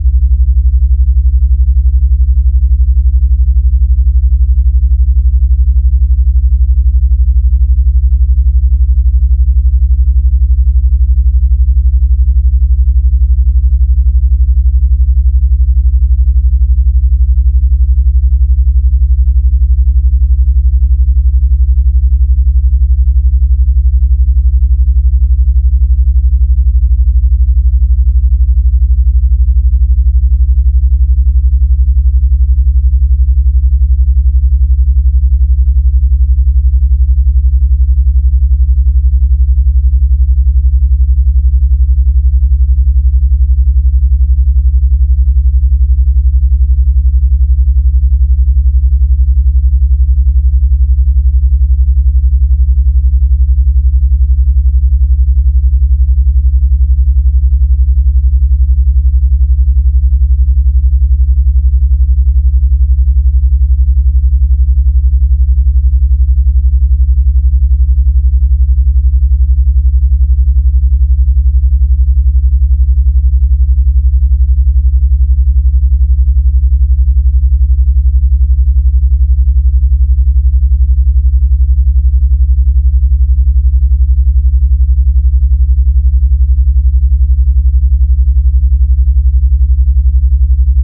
system 100 drones 1
A series of drone sounds created using a Roland System 100 modular synth. Lots of deep roaring bass.